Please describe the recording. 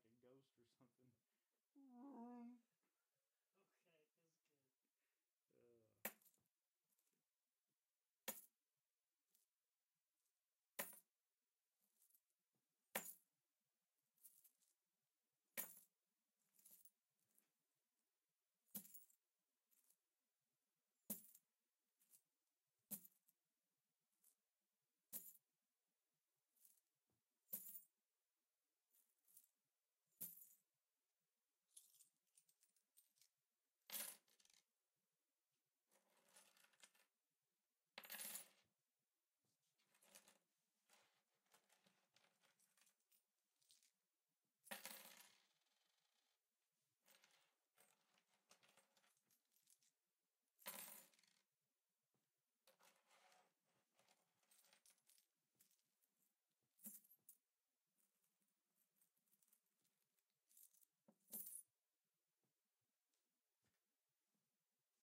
Coins On Table
a bunch of different coins in a small bag being tossed onto a small nightstand then out of the bag